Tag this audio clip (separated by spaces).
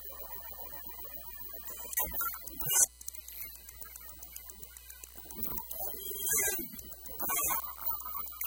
digital music micro broken-toy circuit-bending noise